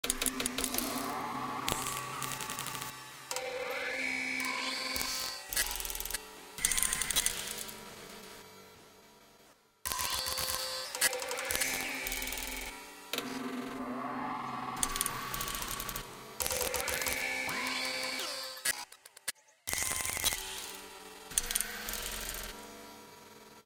Mechanism Glitch Sequence
movement, sci-fi, noise, motor, sfx, industrial, engine, soundeffect, effect, ambience, metal, factory, mechanism, sound-design, robot, glitch, android, futuristic, mechanical, transformers, SciFi, industry, space, creature, machine, drone, future, fx, electric, mecha